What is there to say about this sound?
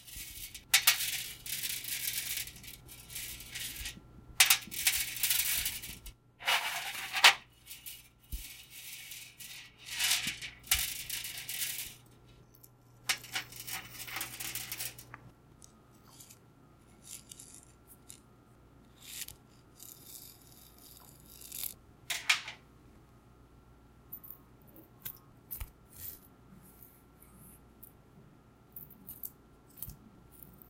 necklace chain on metal table
I dropped a gold chain onto my metal coffee table and then picked it back up again.
chain, clang, clink, jewelry, metal, metallic, necklace, ting, tinkle